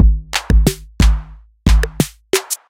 Hip Hop Beat N2
I hope this is usable.
Made in FL Studio 12 using Fruity DrumSynth plugin.
Tempo: 90bpm.